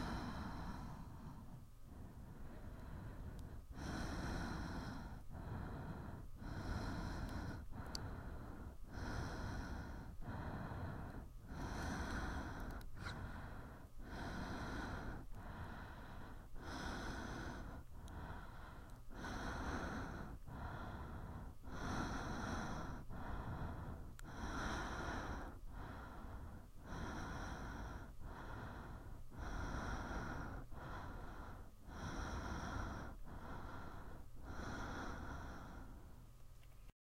RHYTHMISCHES ATMEN T 94
woman breathing, intimate
snarelike, breathing, rhythmic